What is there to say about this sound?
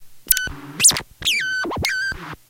tape mini melody
Another short tape derived noise. This one is somewhat melodic.
mechanical, noise, music, tape